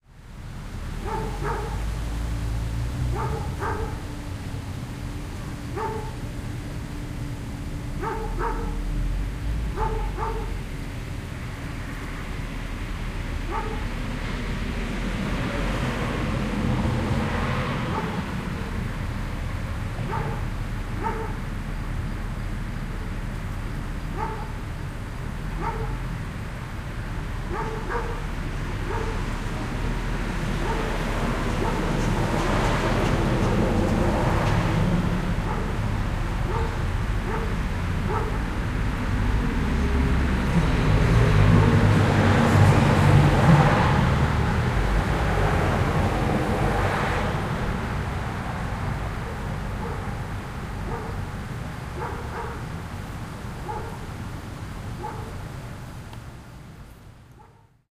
Dog barking in a sketchy neighborhood
Traffic passes as a dog barks in a sketchy neighborhood. The traffic gets quieter for a bit and then more traffic and a car with booming bass passes.
Hear all of my packs here.
bark, dog, neighborhood, noise, traffic